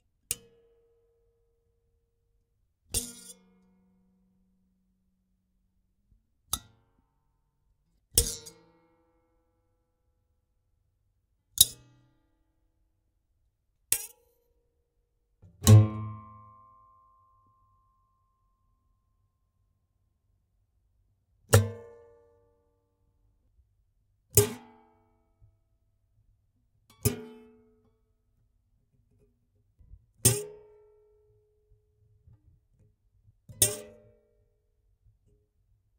Guitar string snap or breaks - various sounds
Various guitar strings snap loose. Recorded with a Sennheiser MKE600 mic to a Zoom H5 recorder.
hit, string, musical, acoustic, funny, awkward, pluck, instrument, cut, strumming, sound, metal, snap, pop, guitar, nylon, cartoon, various, effect, break, loose, set, wood, pack, multiple, toink